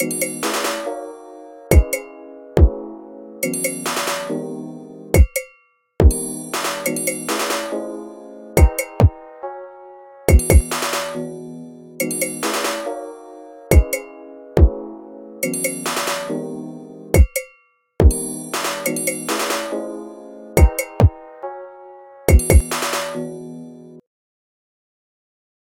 A metallic percussion loop over a chord progression in C major on piano. Perfect for your Blue Man Group cosplay!
drum-loop, piano, percussion-loop